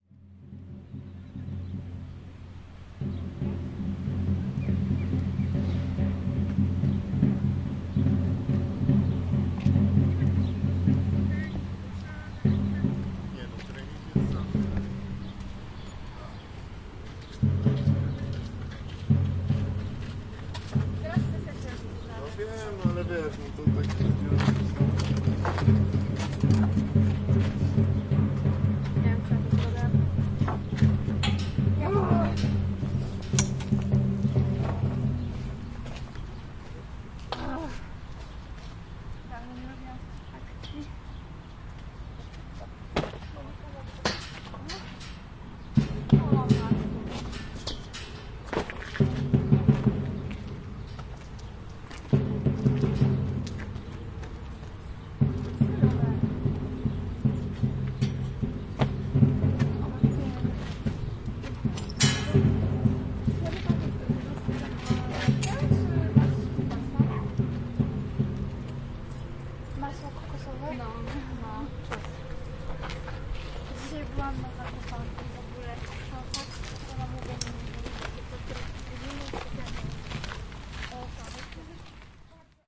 club warta sounds 300511
30.05.2011: about 19.30. Chwialkowskiego street. in front of the Warta club pitch. some people are leaping over the fence. in the background drumming sound. Poznan in Poland.
birds, drum, drumming, fans, field-recording, leaves, people, poland, poznan, sport-club, steps, voices, wind